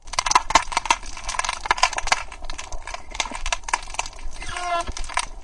underwater recording made in an harbour in Australia. The hydrophone was homemade using a piezo transducer placed inside a mustard jar then hooked up to a small pre-amp circuit and then plugged into a portable DAT. You can hear the distinctive underwater 'crackling sound' as well as a boat squeaking against a tire.